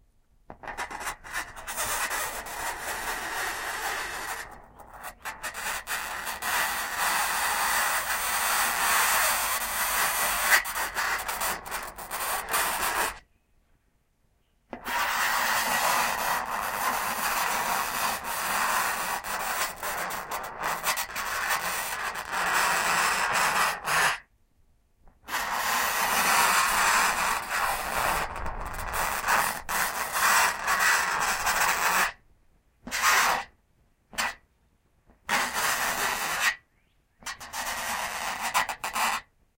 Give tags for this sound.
ouch; siding; chalkboard; screech; fingernails

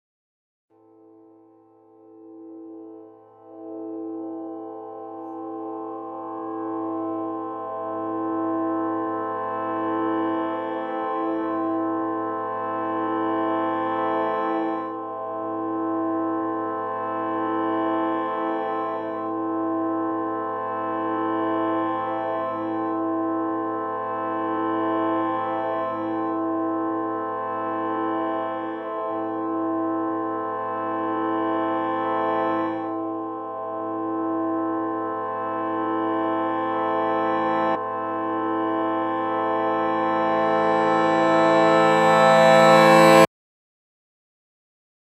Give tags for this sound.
FND112; f13; experimentalaudio

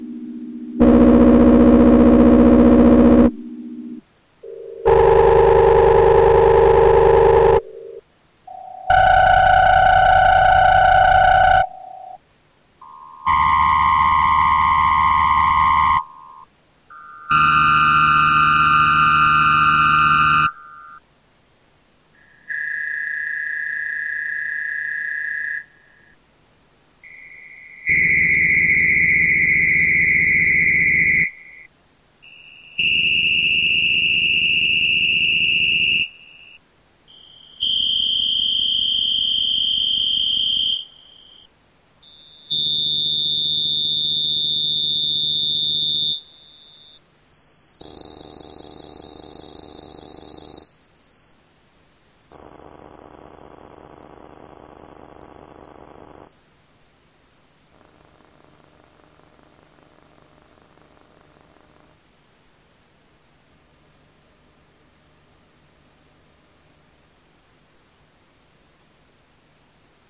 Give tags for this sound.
Kulturfabrik; Synthesizer

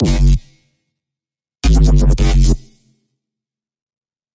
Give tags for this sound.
Bass; Dance; Drop; Dubstep; Electronic; Reese

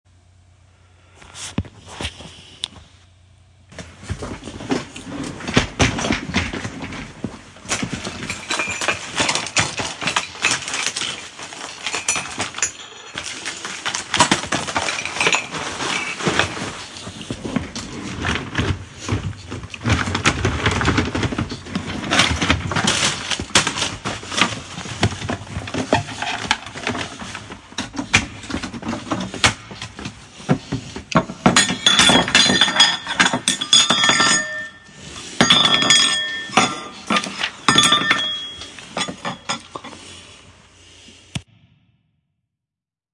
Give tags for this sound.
breaking; furniture; thrashing

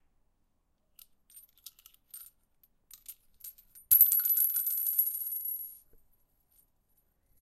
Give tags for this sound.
weapon; shells; magazine; owi; reload; rifle; gun

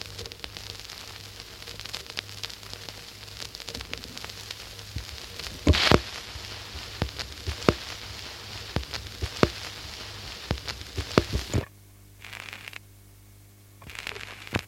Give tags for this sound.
dj; vinyle; disque; craquement; disc; platines; microsillon; gresillement; vinyl

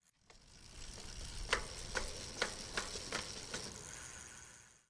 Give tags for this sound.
bicycle; pedaling; aip09

